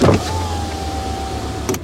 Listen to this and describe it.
car electrical window 4 button opens or closes
variation 4) I press and hold the button to open my car window, then I release it.
Recorded with Edirol R-1 & Sennheiser ME66.
button, buttons, car, close, closes, closing, electric, electrical, glide, opening, opens, slide, switch, switched, window, windows